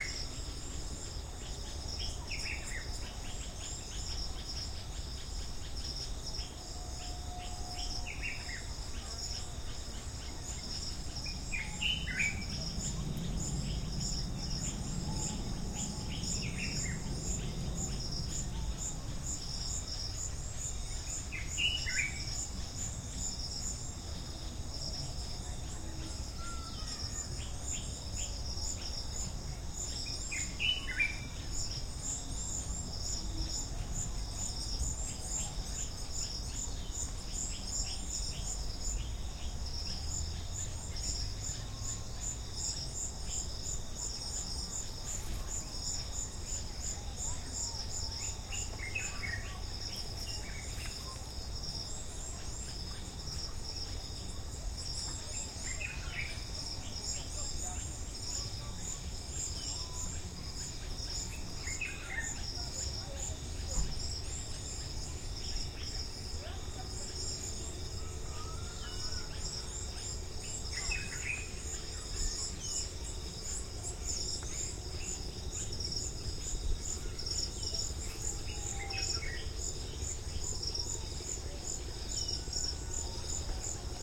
birds and oscillating generator
Bird ambience in Zambia and gentle mysterious humming generator in background
africa, birds, generator